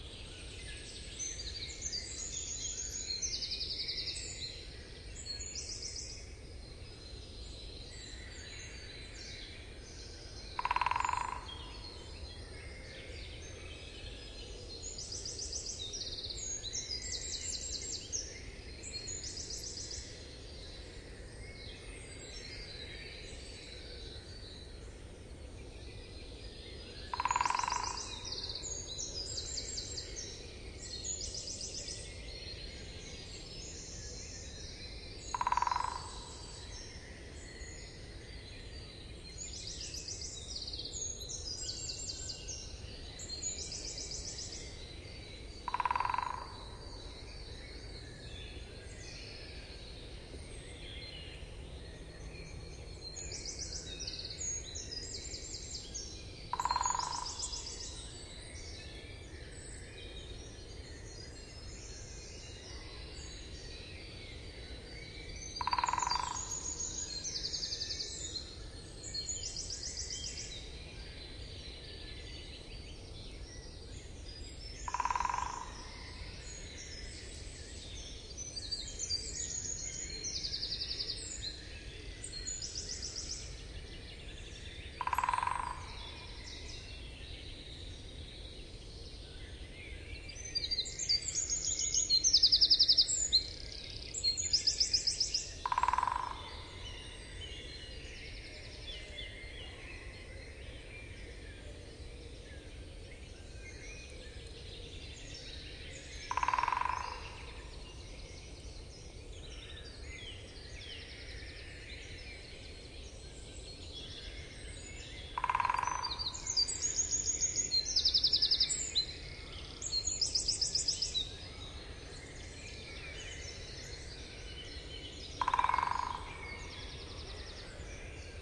hammering woodpecker 2007 04 15
Hammering woodpecker early in the morning in a forest north of Cologne, Germany. Vivanco EM35 into Marantz PMD 671.
kapytikka, picchio-rosso, pico-picapinos, great-spotted-woodpecker, bird, dzieciol-duzy, morning, buntspecht, dendrocopos-major, pic-epeiche, forest, woodpecker, birdsong, harkaly, storre-hackspett